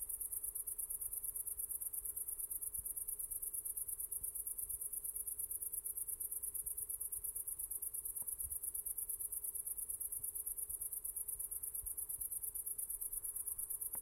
Grasshoppers, recorded with a Zoom H1.